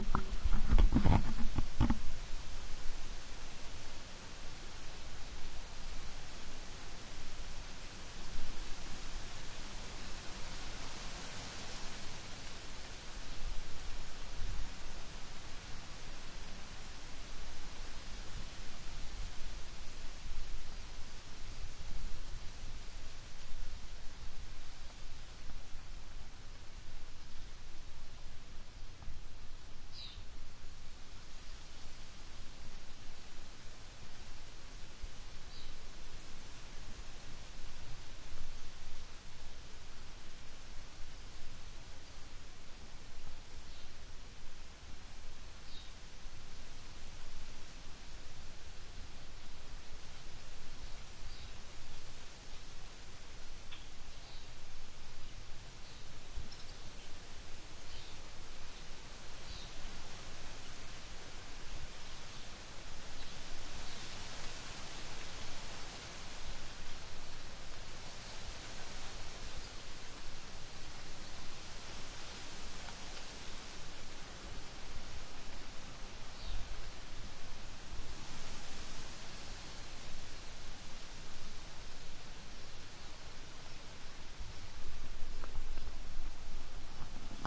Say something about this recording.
Wind In Maple Tree
maple, wind, tree